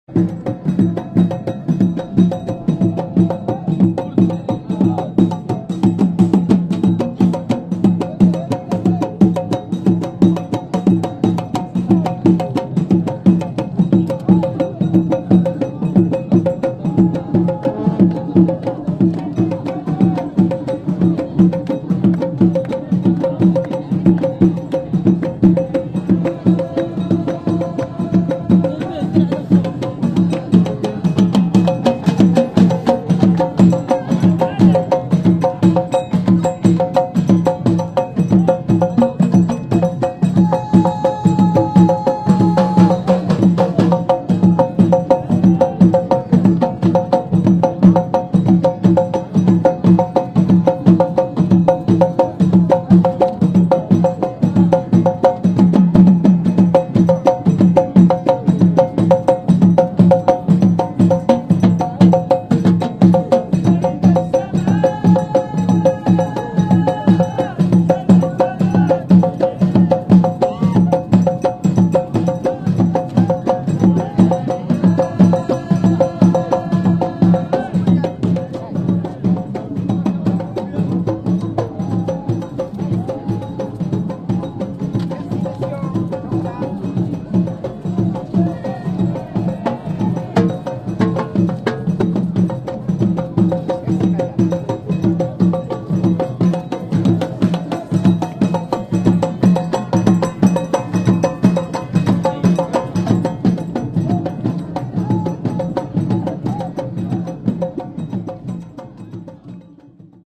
jamaa el fna 2015
This recording is the music performed by street musicians on the Jamaa el Fna square in Marrakesh, 2015
people, H2, field-recording, Zoom, street-musicians, Marrakesh